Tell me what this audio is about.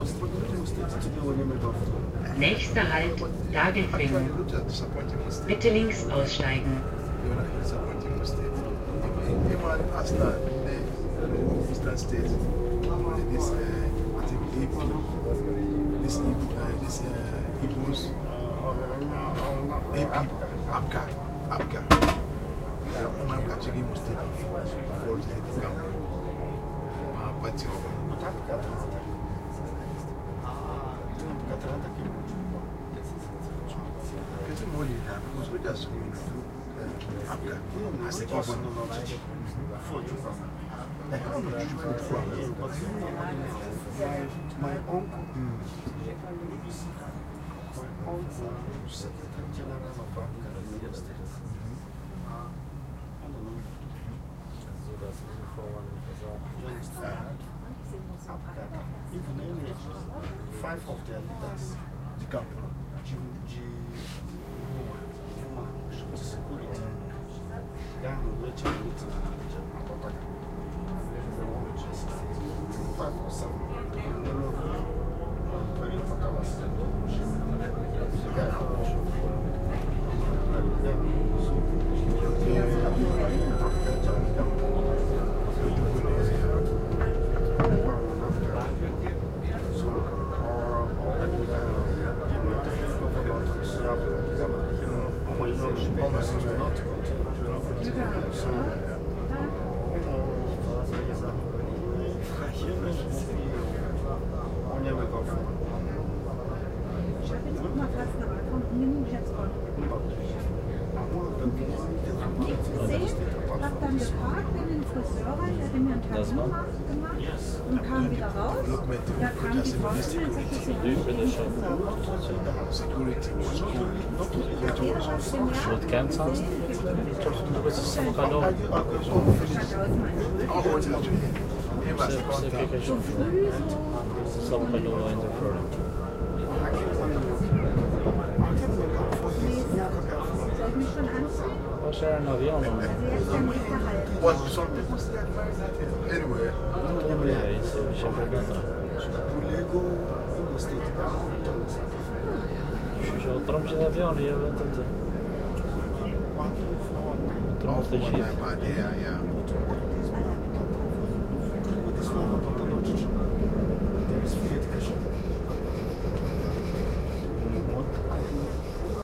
Train ambience and people talking on S-Bahn ride from munich airport to central station.
Recorded with Zoom H1 and Rycote windscreen.
LoCut OFF, manual level
electric, electric-train, metro, people, rail-way, railway, riding